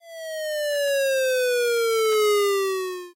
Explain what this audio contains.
8-Bit Fall
An 8-bit-style falling sound created in Chiptone.
retro, fall, video-game, falling, arcade, 8-bit